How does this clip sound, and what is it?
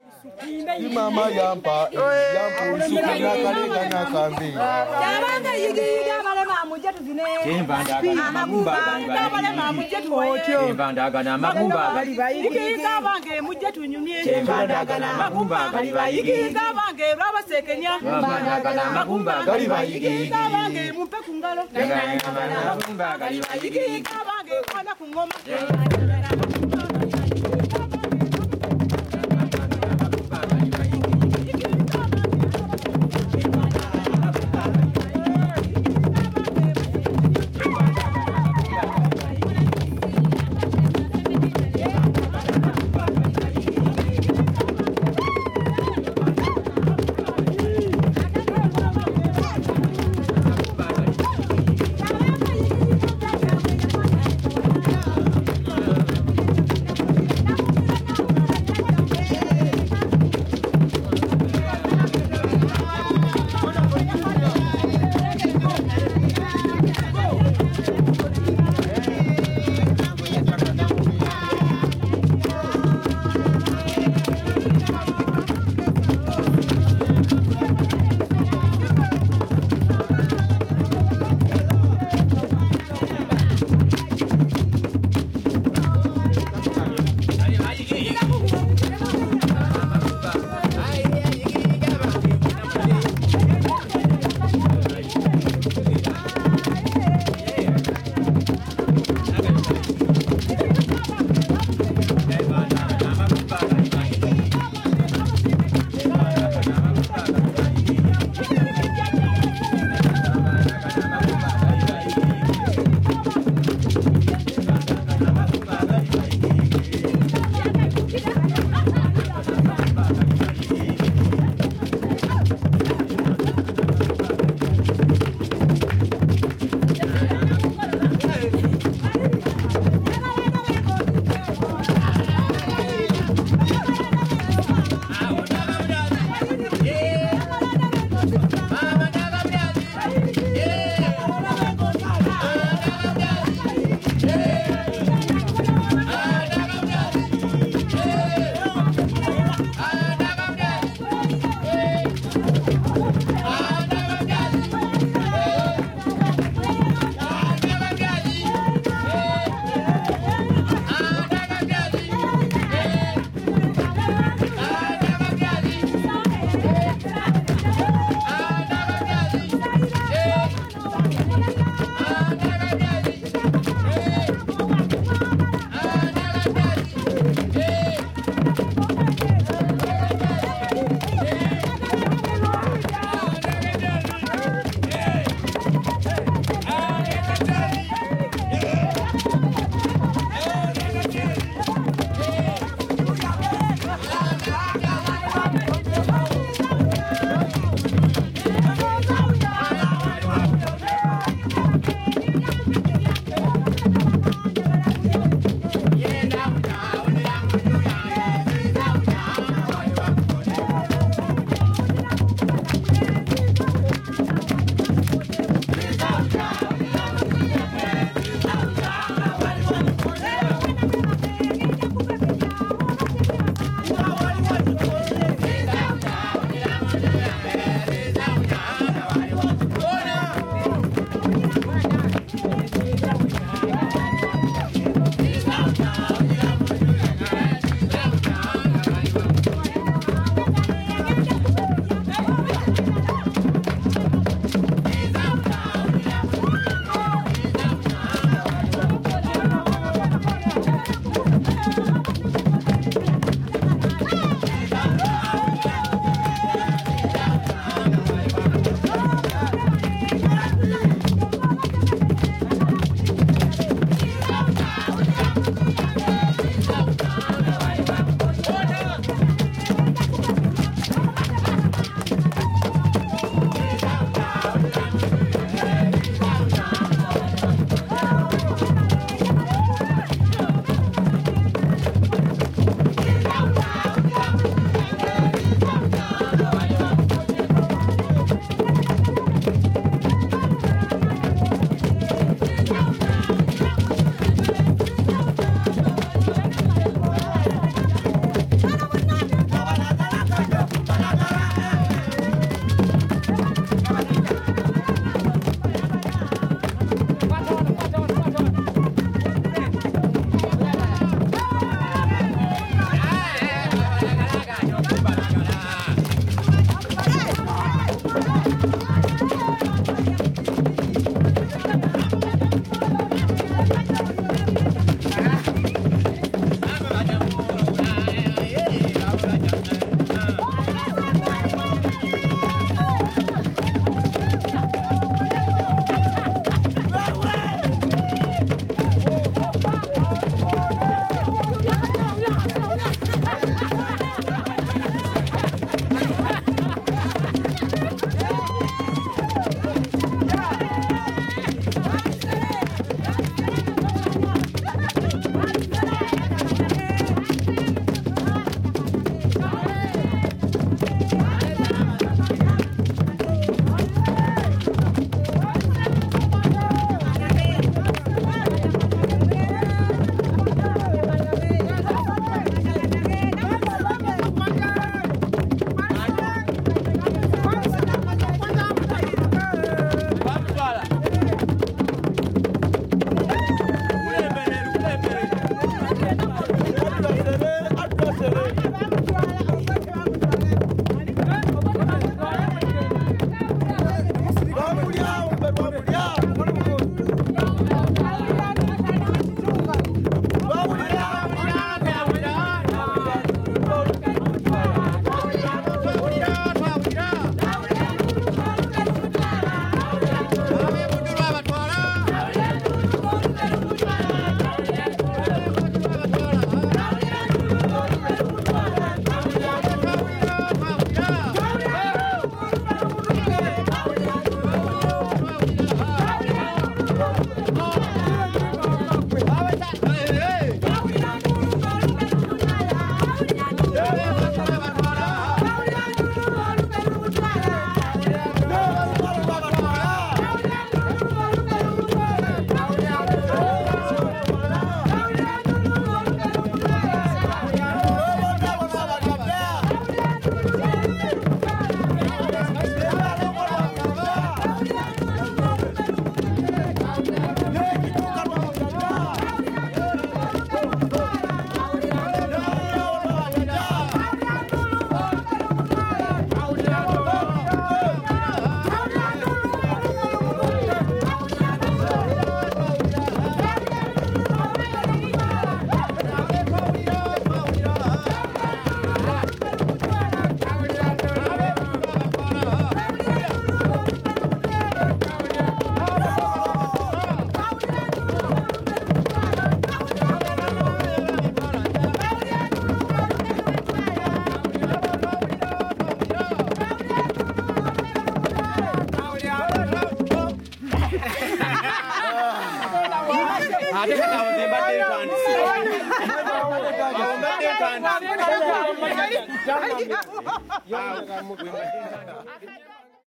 Traditional music from Uganda, Buganda kingdom
Here is a song I recorded while staying at the Dewe Project in Uganda. It was performed as a song and dance in traditional dress with a group from the village.
buganda, buganda-kingdom, vocal